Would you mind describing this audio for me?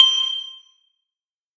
anvil-long
An anvil/metal on metal sound created by resampling and adding lots of EQ (dynamic and static) and some reverb to Incarnadine's oom 1 sample. This "long" version also has added compression.
anvil, bright, hard, industrial, metal, metallic, percussion, processed